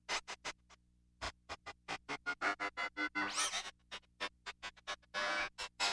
quick scratch of high frequency modulated tone with drop stalls near end